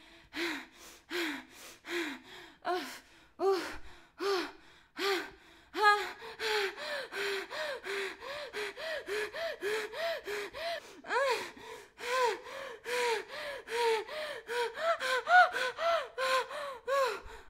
Content warning
Woman panting and breathing heavily during a panic attack or just scared. Was recorded for that purpose but could also be used for sex-related sounds. Recorded on a Zoom H5 with a Sennheiser MKE600 microphone.
woman, suffer, breathe, horror, out, sexual, panting, moaning, panic, heavy, moan, breath, scared, afraid, pleasure, girl, female, gasp, short, sex, voice, intercourse, breathing, pain